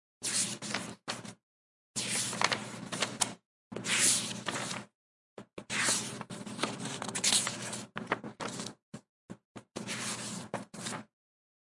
Gravador de áudio zoom H4N e microfone Boom. Efeito sonoro gravado para a disciplina de Captação e Edição de Áudio do curso Rádio e TV, Universidade Anhembi Morumbi.